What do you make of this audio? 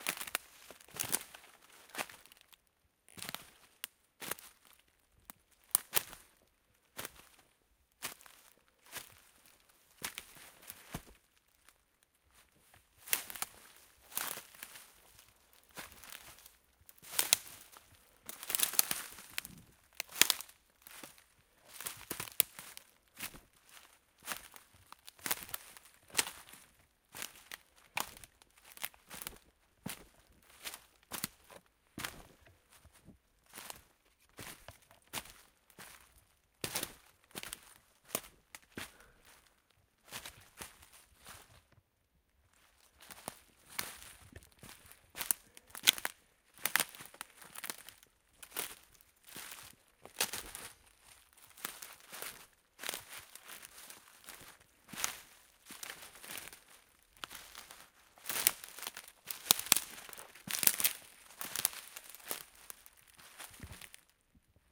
Steps on undergrowth
Walking on cracking undergrowth in a forest on Tenerife, Spain in December. Recorded with an Olympus LS-12 and a Rycote windshield.
chaparral, forest, understorey, walk, crack, scrub, footstep, shoe